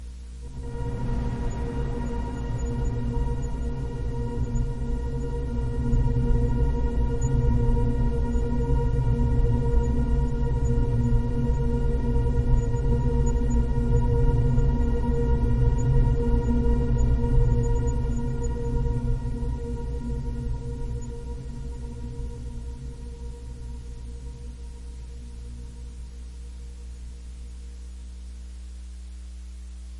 abyss ambience4
Abyss, Ambiance, Ambience, Ambient, Atmosphere, Creepy, Dark, Darkness, Drone, Film, Horror, Movie, Scary, Sound